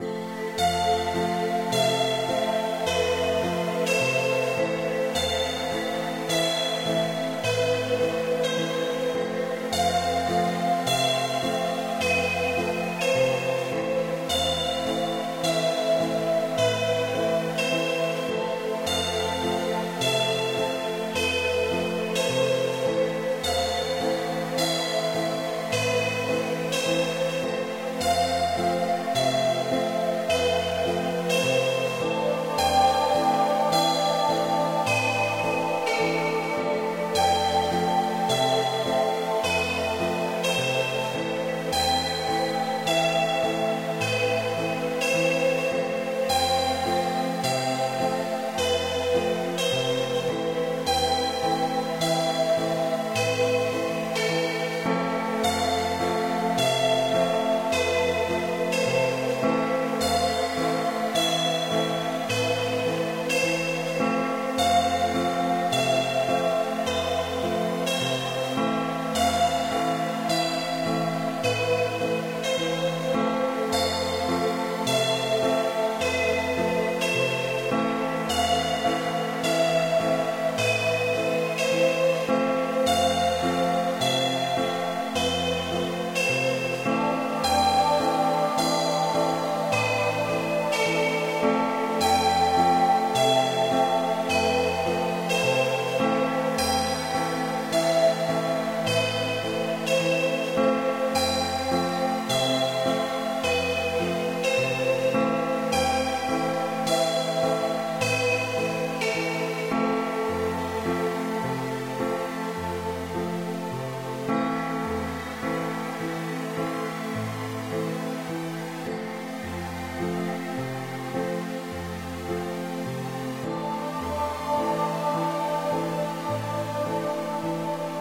electronic pop ambience.
synths:ableton live,kontakt.
original, music, strange, guitar, chorus, electronic, electric, piano, atmosphere, digital, abstract, dark, sounddesign, ambiance, pop, sci-fi, future, noise, loop, loopmusic, pad, ambient, sound-design, synth, soundeffect